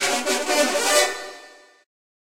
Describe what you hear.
trompetas del norte